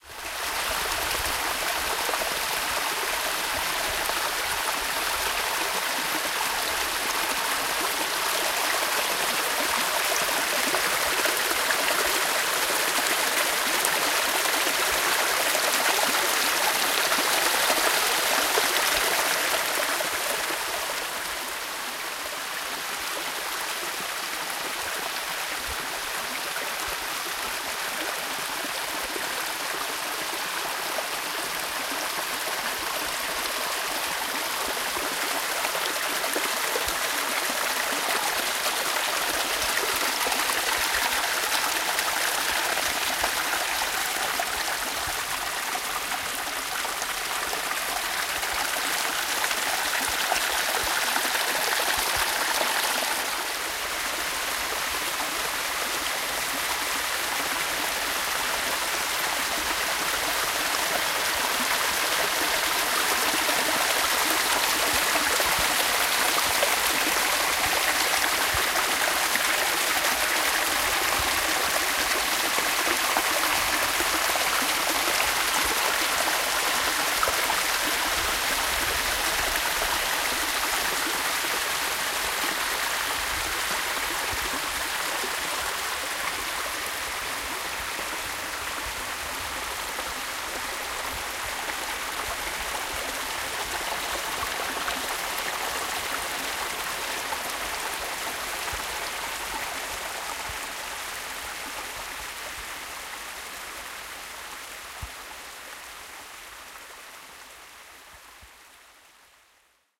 Streaming river waters and small waterfall
Recorded a small waterfall and streaming water of forest river: different perspectives and distances. XY stereo recorder used.